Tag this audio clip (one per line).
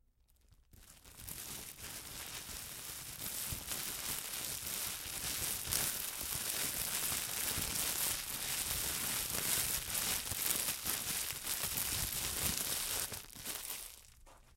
mercado
saquinho-pl
stico
plastic
sacola-pl